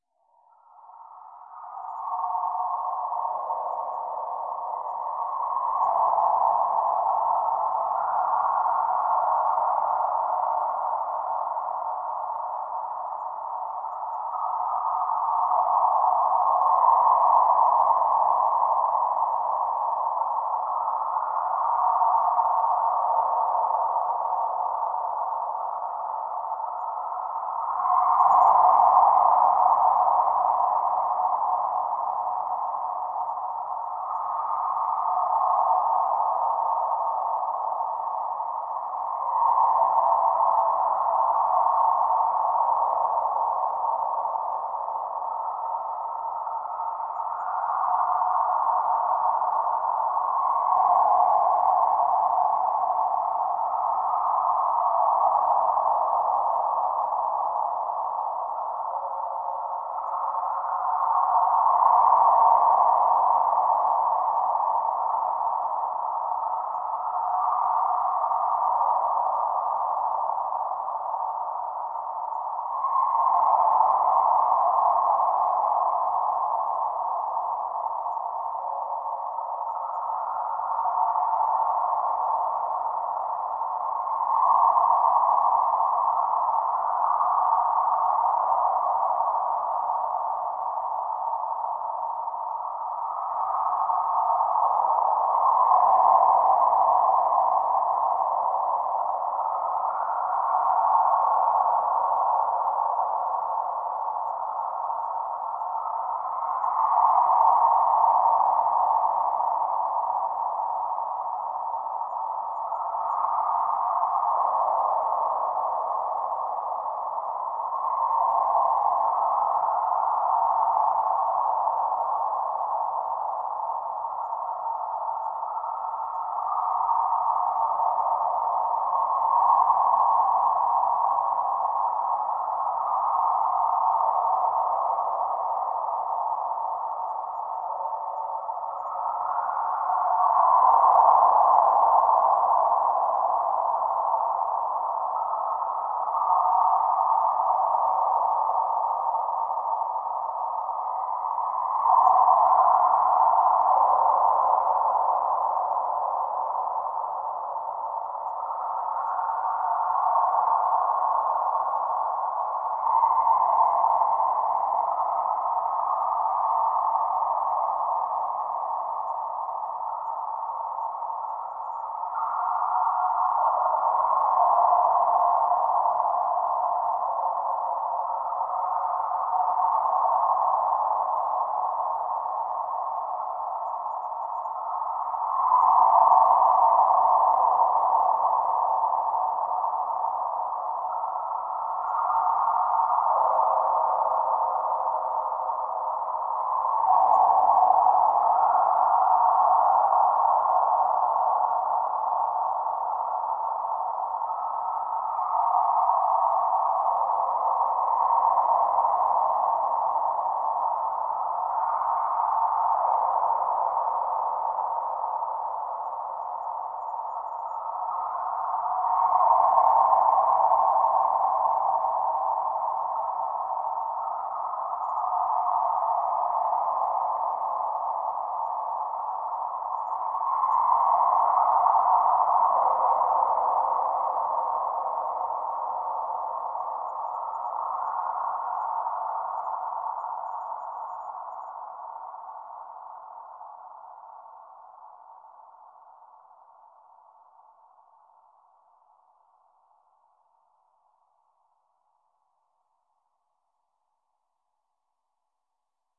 EZERBEE DEEP SPACE DRONE AAAAAA
This sample is part of the "EZERBEE DEEP SPACE DRONE A" sample pack. 4 minutes of deep space ambiance. The sound was send through the Classic Verb from my TC Powercore Firewire.
soundscape, space, drone, electronic